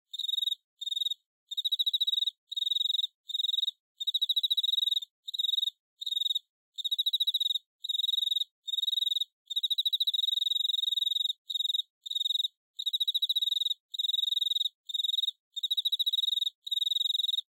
Cricket Sliced and Diced
This is an 18 second recording of a cricket at close range ~10 cm. Required a lot of stealth to get in close without disturbing the little blighter :) If you open this recording in a frequency-spectrum view you will see that I have filtered out all the non-cricket frequencies to leave a hi-fi cricket against an inky backdrop.